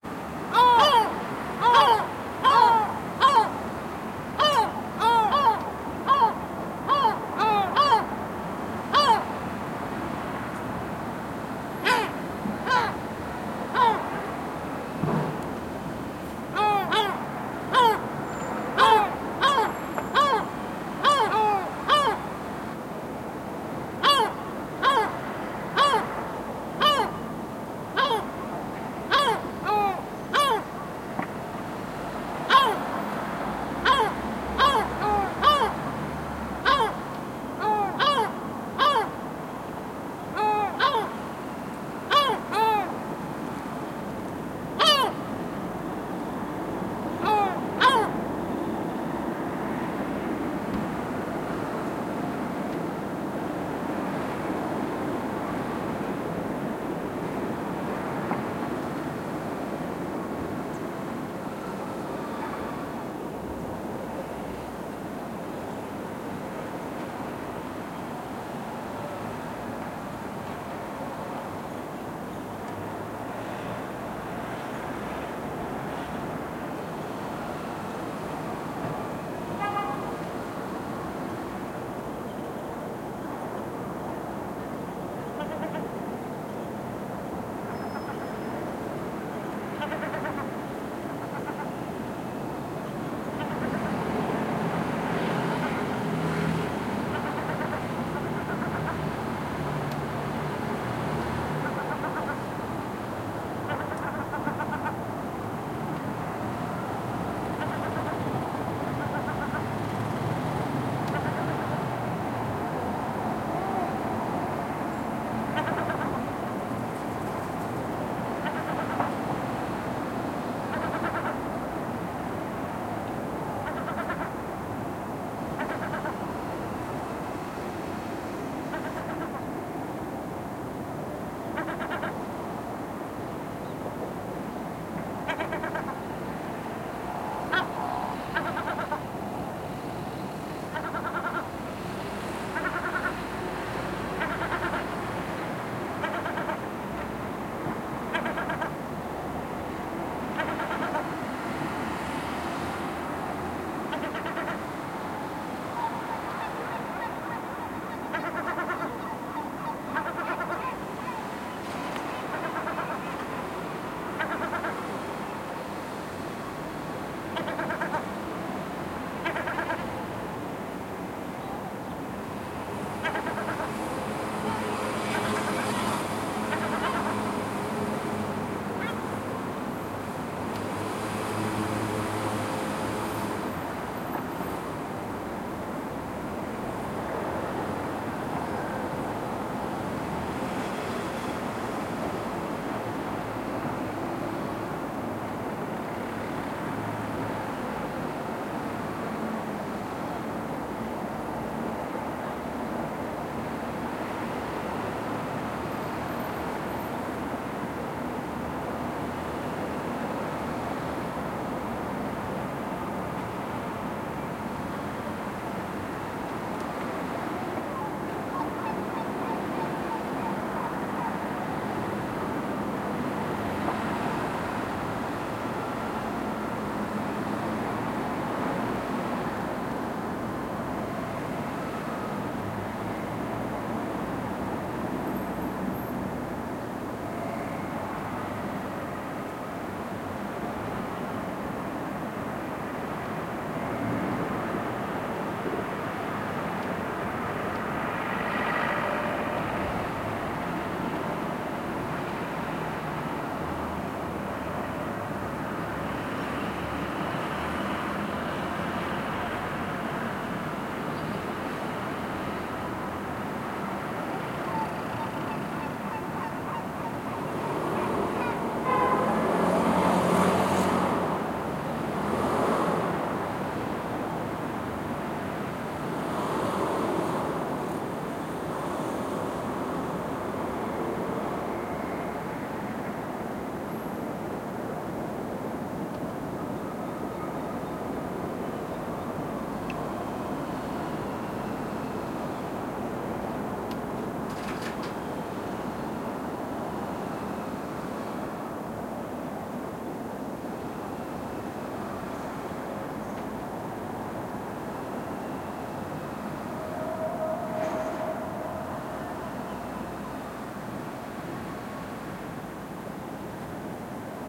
Seagull City
Recording of some seagulls on my roof and traffic on background.